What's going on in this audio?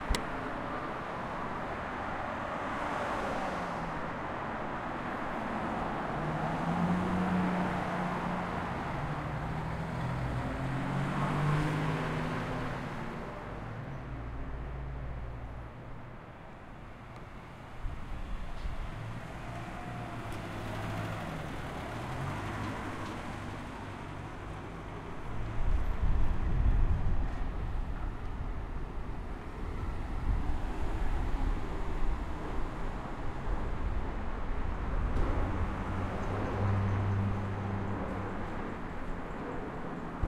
STREET TRAFFIC
Decided to catch the noises of the city street one day, while walking around.